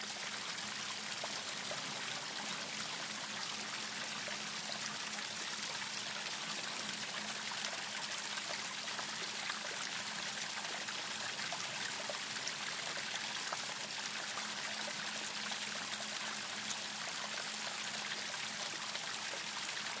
little river
h4n X/Y
little, river